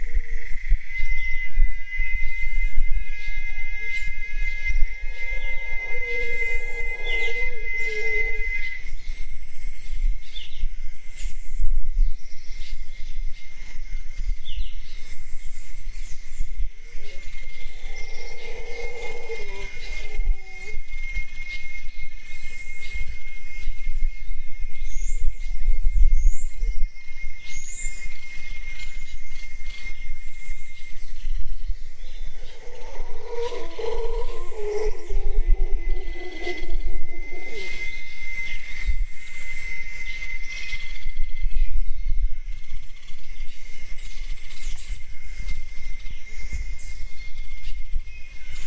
Tropical Dawn HowlerMonkey background
Taken just after dawn in Costa Rica. Found a spot near some Howler Monkeys.
ambient, America, birds, Central, Costa, environment, field-recording, insects, jungle, monkeys, Rica